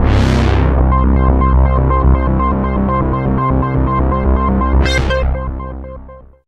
Minimoog bass
From a Minimoog